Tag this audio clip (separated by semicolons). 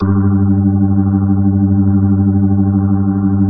sample; rock; sound; organ